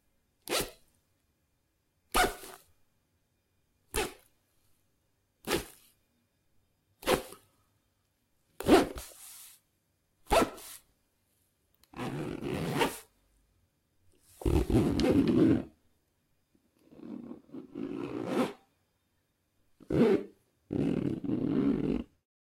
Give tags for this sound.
bag clothing purse zipper

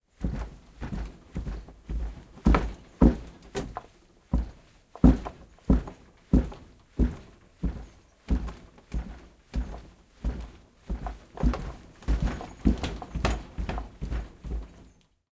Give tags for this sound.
extreme floor footsteps ground heavy machine

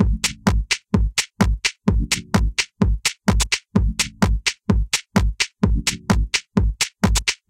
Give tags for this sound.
deep loop tech

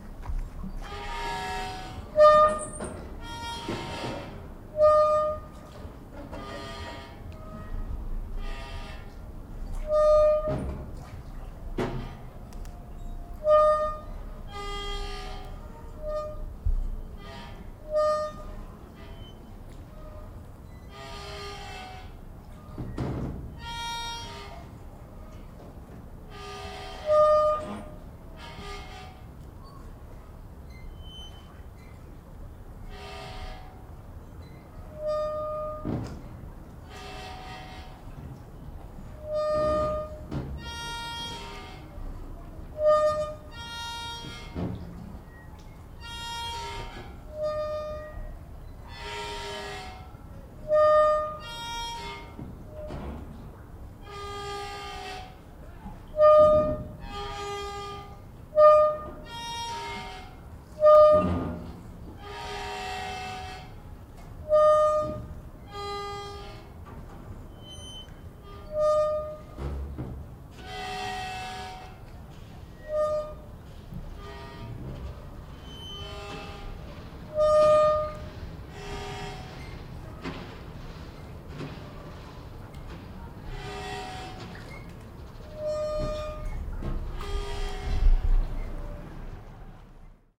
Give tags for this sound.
rope field-recording stress close-up boat ship noise grindings metal creacking marina harbour water

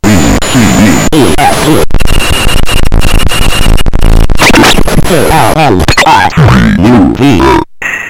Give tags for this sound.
bending glitch

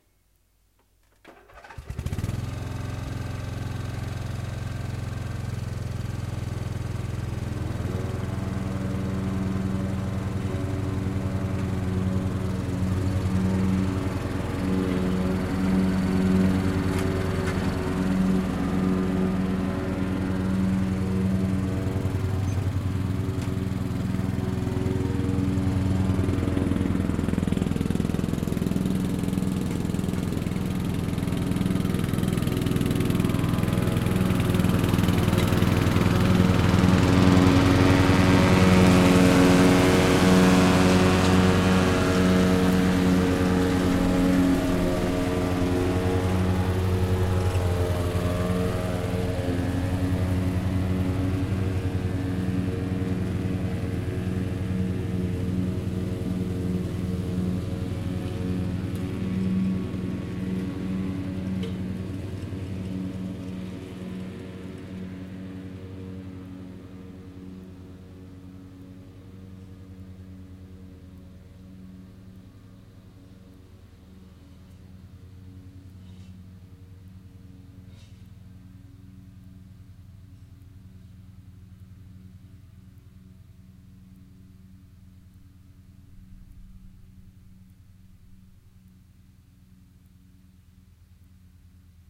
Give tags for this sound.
garden lawn grass lawnmowing tractor

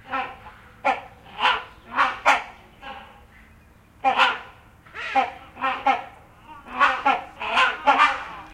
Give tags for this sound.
field-recording bird tropical-bird rhinoceros-hornbill jungle bird-call bird-song

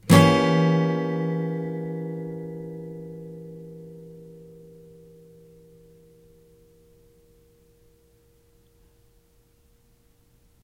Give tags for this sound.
chord acoustic guitar

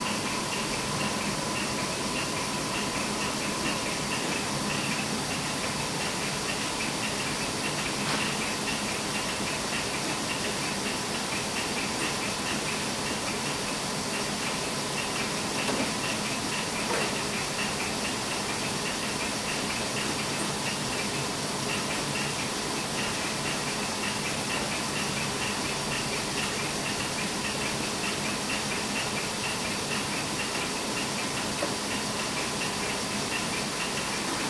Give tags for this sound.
Indoors Machine

